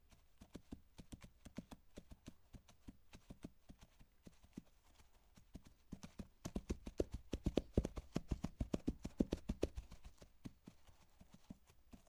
Hooves, Hard Muddy Surface / Layer 03
Microphone - Neumann U87 / Preamp - D&R / AD - MOTU
Coconut shells on a muddy, hard surface.
To be used as a part of a layer.